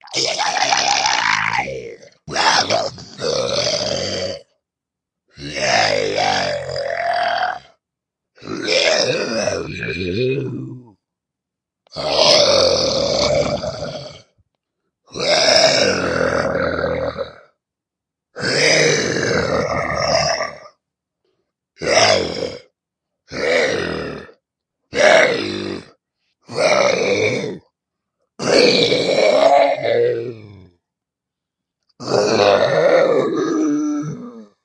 Some random ghoulish sounds